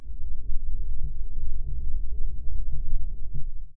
Sonido de vació
magic, misterious, void